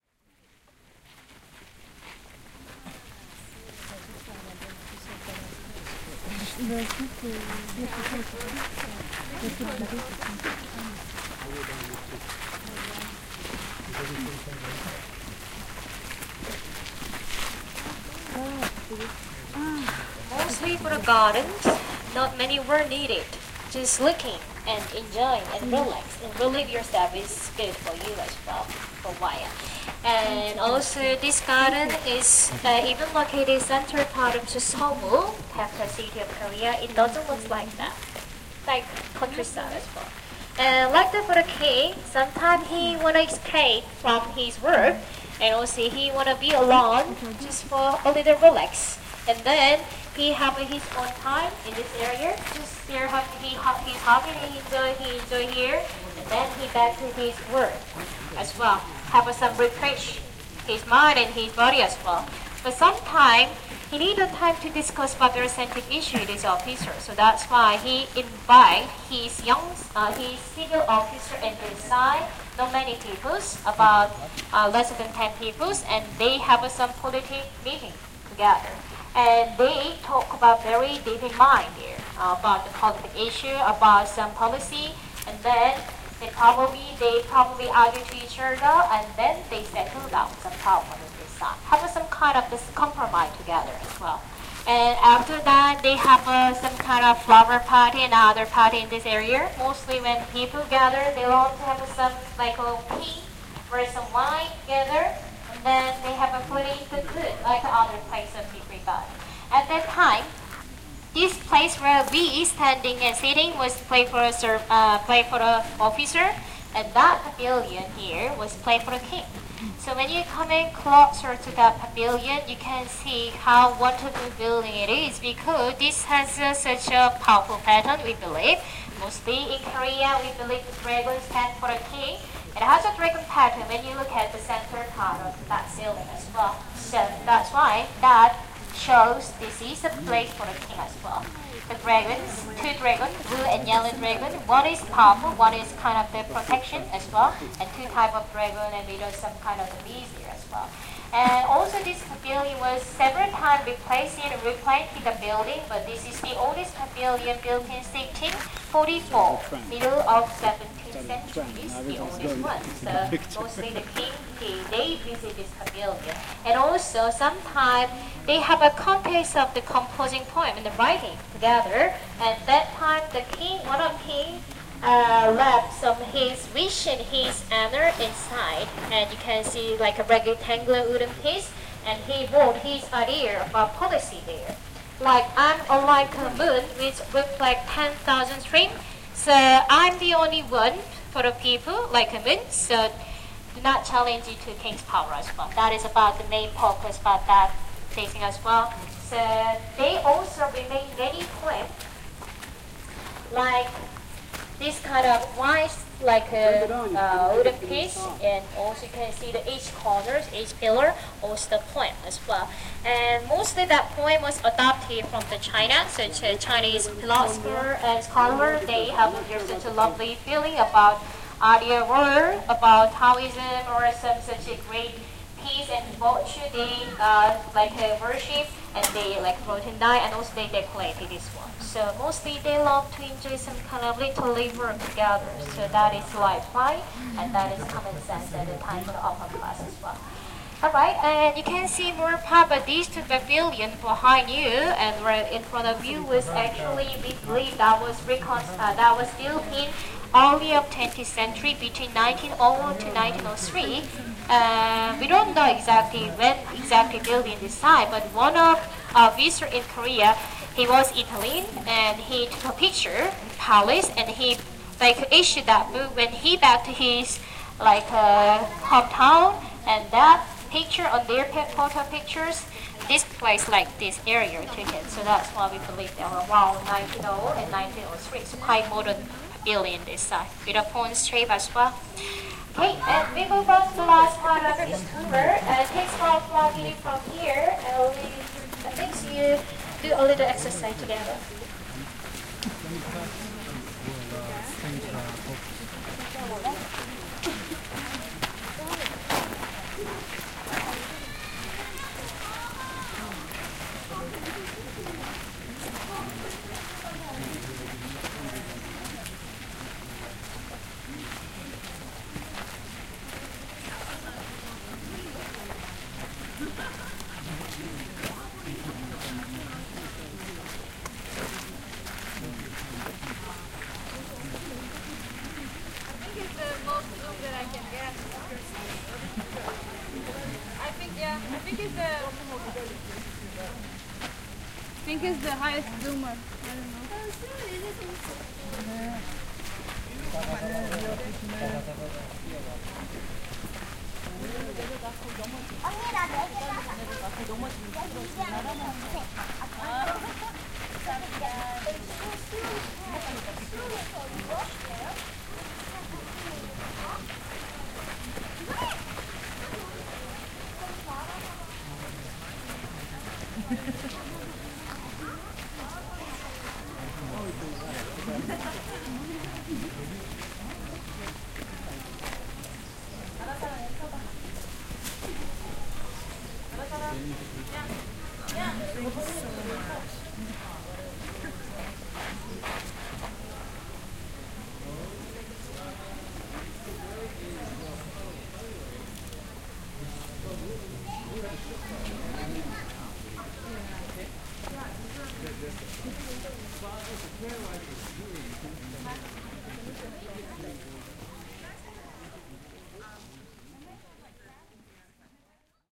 0376 Tourist guide
Tourist guide in English and footsteps at Changdeokgung Palace, Secret Garden.
20120721
english, field-recording, footsteps, korea, seoul, voice